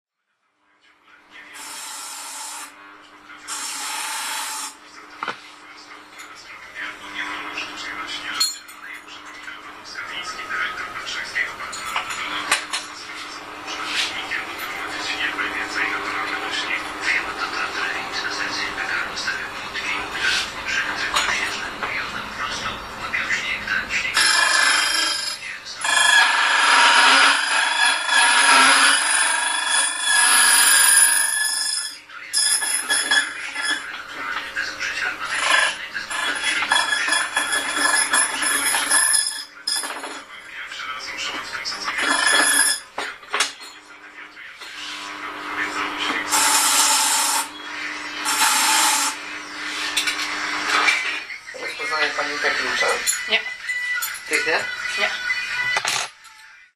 making duplicate keys 050111
05.01.2011: about 12.00. Gwarna street (exactly in the middle of the city of Poznan in Poland). short recording from duplicate keys workshop (a little one). the sound of the duplicating machine.